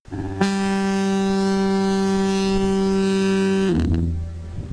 this is a sample of an actual fog horn.